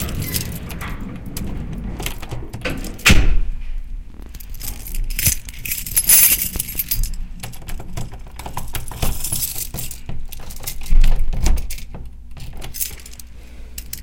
Keys Door
door, keys